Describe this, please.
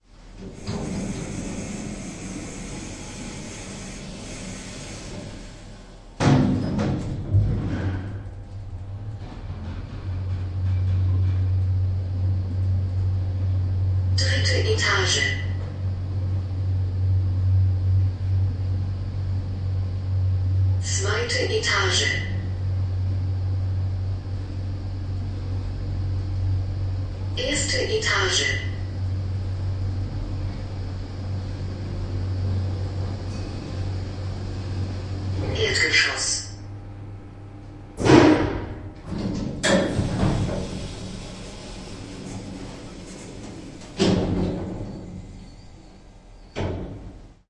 German Elevator With Voice
Going down a few levels in a big elevator at HTW Berlin Wilhelminenhof.
Recorded with a Zoom H2. Edited with Audacity.
Plaintext:
HTML:
transportation, university, field-recording, htw, close, elevator, berlin, open, announcer, lift, voice, doors, school, metal